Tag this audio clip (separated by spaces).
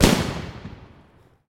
detonation dynamite burst sfx firework boom sondeffect explode eruption bomb blast explosive explosion bang tnt movie